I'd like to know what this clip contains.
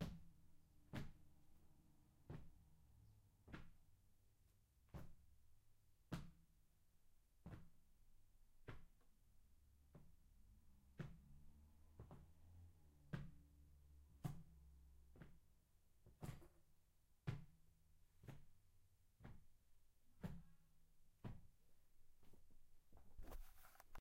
slow walking hard surface 1-2
Slow walking, back and forth, as Roland Edirol recorded me walking by. A crappy external, dynamic microphone was plugged in. It was recorded in a wide open space so the footsteps really stand out against the coldness of the concrete floor. I believe I was wearing boots and the footsteps sound rather deliberate.
deliberate, walking, concrete